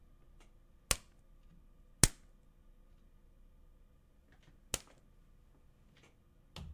paper flicked
several sheets of paper being flicked
paper, flicked, plucked